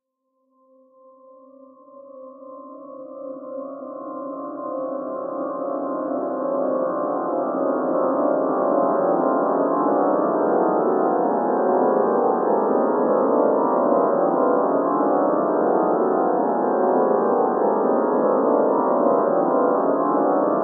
The Most Terrifying Sounds Ever
Pad sound very creepy sounding.
detuned, evil, horror, movie, tone